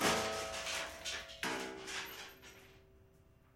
nails thrown in piano